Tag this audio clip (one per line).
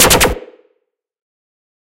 mettalic
zap